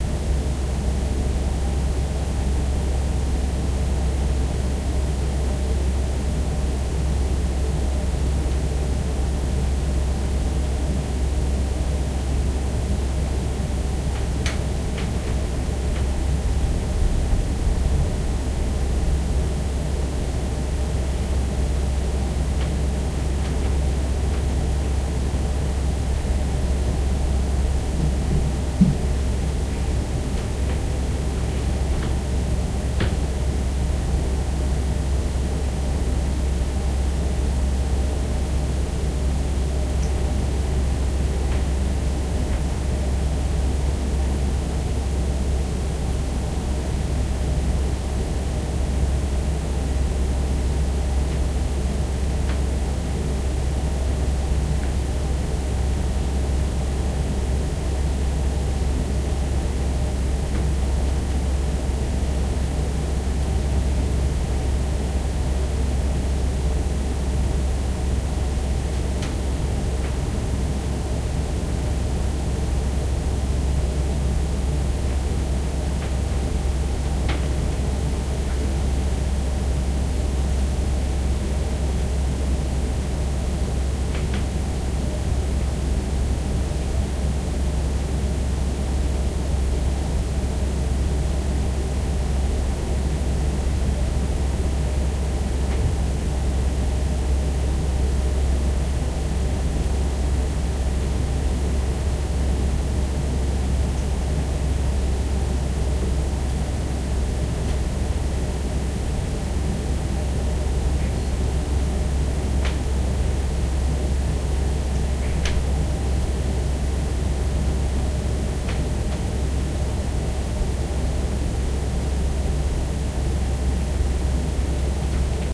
Cruiseship - inside, crew cabin at night (air condition, low engine sound, occasional sounds from the next cabin). No background music, no distinguishable voices. Recorded with artificial head microphones using a SLR camera.
ambiance, ambience, atmosphere, cabin, cracking, crew, Cruiseship, engine, field-recording, general-noise, indoor, soundscape